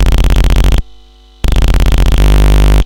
You know these Electronic Labs for kids & youngsters where one builts electronic circuits in a painting by numbers way by connecting patch-wires to springs on tastelessly colourful boards of components?
I tried and recorded some of the Audio-related Experiments - simple oscillators, siren, etc. from a Maxitronic 30 in One Kit.
I did not denoise them or cut/gate out the background hum which is quite noticable in parts (breaks) because I felt that it was part of the character of the sound. Apply your own noise reduction/noise gate if necessary.